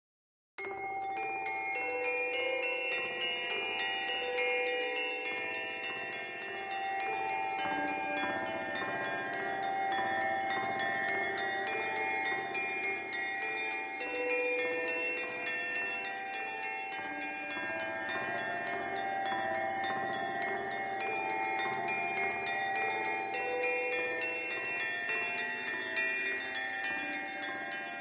ABleton Live Synthesis